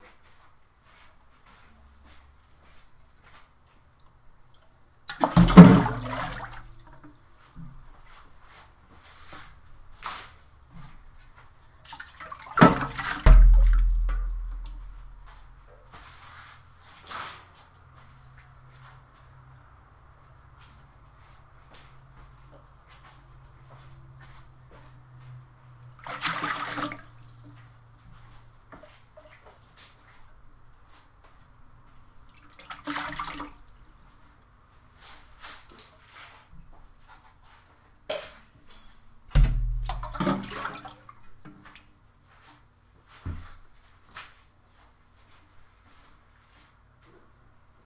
tlf-water scoops 01
Scooping water with a metal can